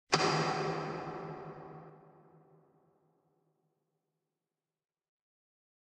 16582 tedthetrumpet kettleswitch1 (remix2)
I took ted the trumpets switch and added an echo to make it sound like a fluorescent light turning off in a warehouse.
lowered the pitch a bit on this one
switch, echo